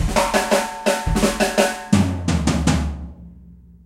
Rock beat loop 5 - hiphop ride hangsnare fill
A drum fill with rimshots and toms. I played it intending that the first snare hit is on the ONE of the bar.
Recorded using a SONY condenser mic and an iRiver H340.